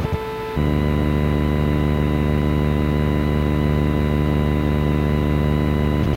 on hold1
analog, comms, communication, digital, distorted, distortion, electronic, field-recording, garbled, government, military, morse, noise, radar, radio, receiver, signal, soundscape, static, telecommunication, telegraph, transmission, transmitter